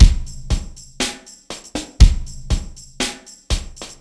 kick snare loops reverb variations 120bpm
loops, reverb, kit, realistic, drumkit, drum, kick, snare